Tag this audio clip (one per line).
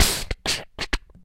beatbox
dance
dare-19
break
voice
vocal
sample
mouth
dums
breakbeat